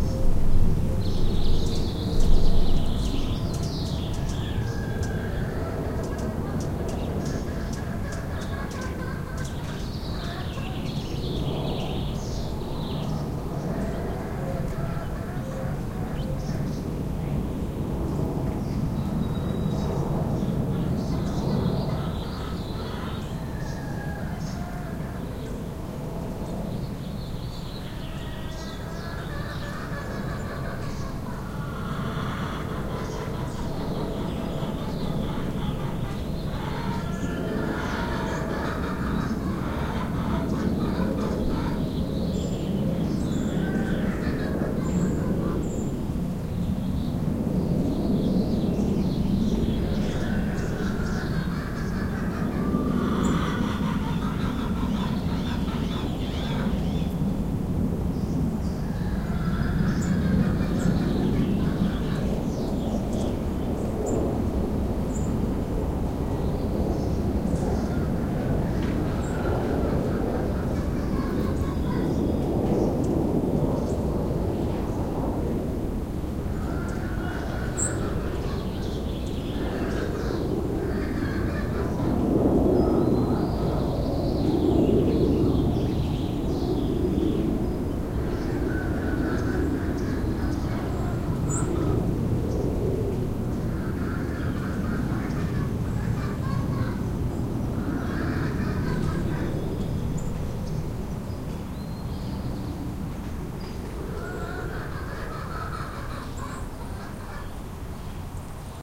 birds chirping and chickens and roosters cackling and crowing and an airplane passing overhead. recorded in pacifica california.